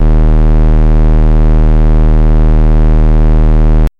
LR35902 Square Ds2
A sound which reminded me a lot of the GameBoy. I've named it after the GB's CPU - the Sharp LR35902 - which also handled the GB's audio. This is the note D sharp of octave 2. (Created with AudioSauna.)
fuzzy, chiptune, square, synth